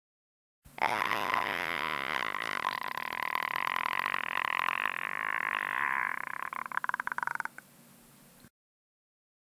Predator noise
Cricking, dinosaur, predator, sound